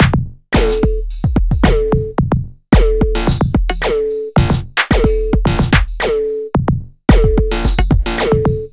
TechOddLoop2 LC 110bpm
Odd Techno Loop
loop, odd, techno